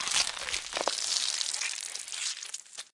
Zombie Bite 1
Single zombie flesh bite